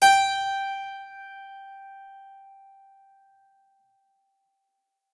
Harpsichord recorded with overhead mics
instrument, Harpsichord